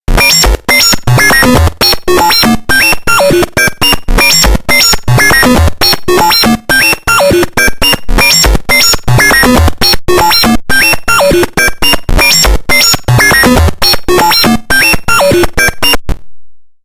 techno 4 120bpm
The developers gave no explanation to its users and continued to sell the non-working app and make other apps as well. These are the sounds I recorded before it was inoperable and the source patches seem to be lost forever.
beep, beeps, metalic, techno